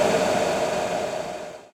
These set of samples has been recorded in the Batu Caves temples north of Kuala Lumpur during the Thaipusam festival. They were then paulstretched and a percussive envelope was put on them.
BatuCaves, Paulstretch, Thaipusam